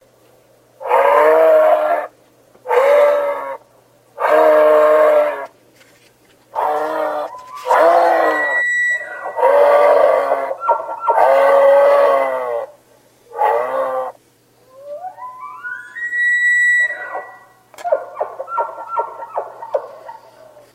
Moose Elk Moose and Elk together
Elk, Moose